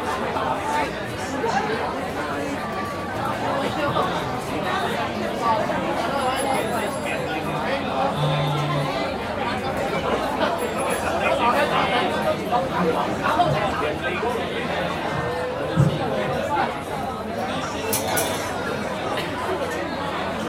Ambiance - Restaurant, Chinese Wedding 2
Chinese wedding dinner at a restaurant.
Recorded on a Zoom H4n recorder.
ambiance, ambient, asian, chinese, dinner, field-recording, party, restaurant, wedding